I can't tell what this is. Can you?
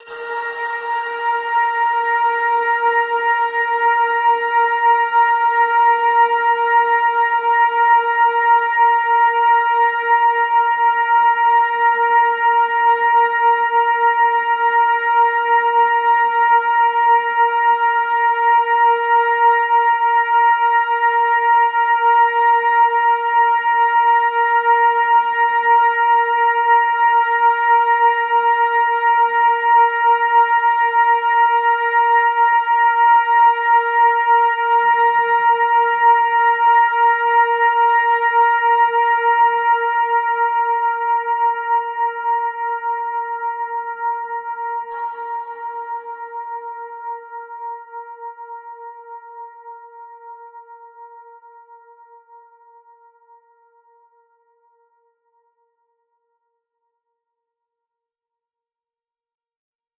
Layers 13 - FRYDAY-70
LAYERS 013 - FRYDAY is an extensive multisample package containing 128 samples. The numbers are equivalent to chromatic key assignment covering a complete MIDI keyboard (128 keys). The sound of FRYDAY is one of a beautiful PAD. Each sample is one minute long and has a noisy attack sound that fades away quite quickly. After that remains a long sustain phase. It was created using NI Kontakt 4 and the lovely Discovery Pro synth (a virtual Nordlead) within Cubase 5 and a lot of convolution (Voxengo's Pristine Space is my favourite) as well as some reverb from u-he: Uhbik-A.